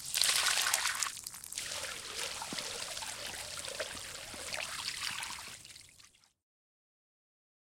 So much blood spilling out of a crushed zombie you'd think he was a waterbed.